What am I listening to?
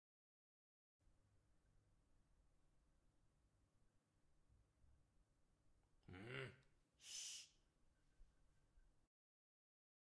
GRUNT SSHH
This is the sound of a man grunting.
grunt, man, shh, speak, voice